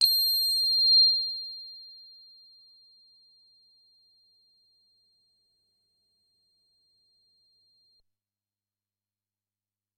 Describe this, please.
DDRM preset #14 - C8 (108) - vel 90
Single note sampled from a Deckard's Dream DIY analogue synthesizer that I built myself. Deckard's Dream (DDRM) is an 8-voice analogue synthesizer designed by Black Corporation and inspired in the classic Yamaha CS-80. The DDRM (and CS-80) is all about live performance and expressiveness via aftertouch and modulations. Therefore, sampling the notes like I did here does not make much sense and by no means makes justice to the real thing. Nevertheless, I thought it could still be useful and would be nice to share.
Synthesizer: Deckard's Dream (DDRM)
Factory preset #: 14
Note: C8
Midi note: 108
Midi velocity: 90